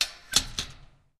A rusty small gate closing/opening.
close, squeek, metal, gate, latch, handle, open, rusty, stereo